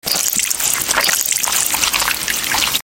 Horror Gore Sound
Gore sound created by squishing watermelon
guts, gore, blood, gross, horror, squish